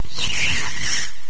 Traveling through a space chute?
This was one of a few effects I made for a few friends making a side-scrolling video game when they were in college.
This was recorded using a crappy, brandless PC microphone; recorded directly to PC using Sound Recorder in windows 95. Original waveform was a sample of my own voice, with post-effects added.
distortion, chute, fuzz